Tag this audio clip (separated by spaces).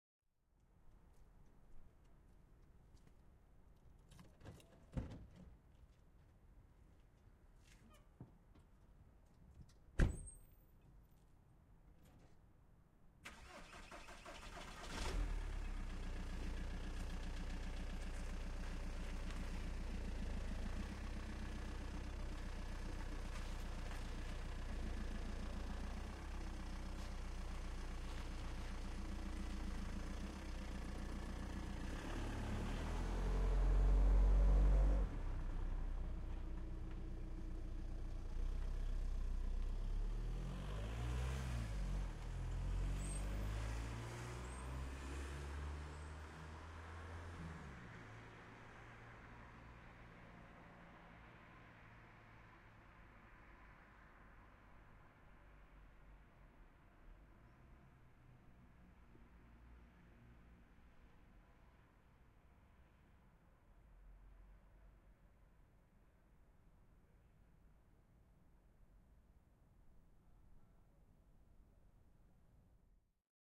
automobile car engine ignition steps